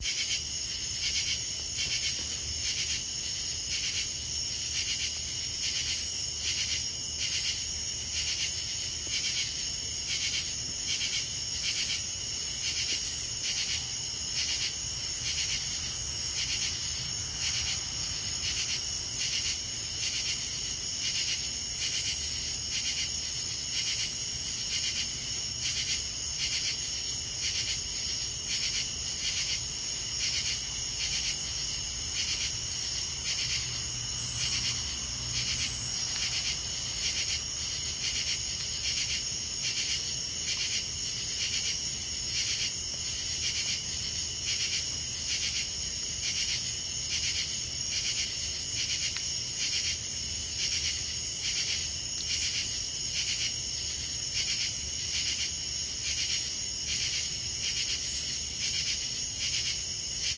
Bugs Chirping In Evening
Enjoy these sounds of a nice clear summer night.
Software and Device: Easy Voice Recorder app for Kindle Fire
Date: August 17, 2015
Amy Marie
bugs, crickets, evening, nature, night, night-time, suburb, suburban, summer